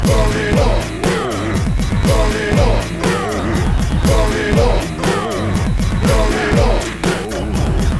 burnin up
Loop from mixdown of song about the French Pimp of Palm Aire recorded mixed and processed in Voyetra record producer. Vocals by "Deadman" (same singer from "arguments in the attic" pack) Tempo unknown will dig up cd rom with master multitrack and update info.
vocals, sample, bass, rap, sound, drums, song, free, metal, killer, best, heavy, funk, loop